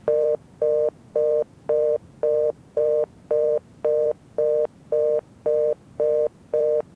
08/01/2018 found tapes excerpt 6
Excerpt from one of two microcassettes; I forgot when/where I found them so I'm using the date of upload as a point of reference.
Lo-fidelity busy signal beeping tone.
answering, beep, found, foundsound, machine, phone, sound, telephone, tone